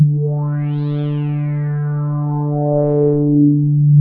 1 of 23 multisamples created with Subsynth. 2 full octaves of usable notes including sharps and flats. 1st note is C3 and last note is C5.